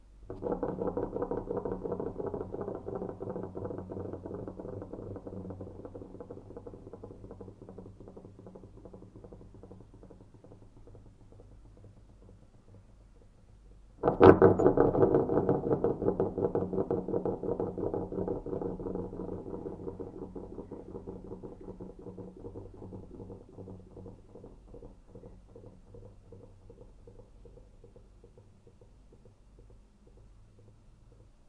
the noise of a pebble rolling rhythmically on another. PCM M10 recorder, internal mics